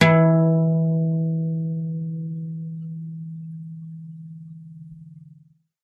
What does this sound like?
Single note played on an acoustic guitar from bottom E to the next octave E
guitar, acoustic-guitar, acoustic, pluck, single-note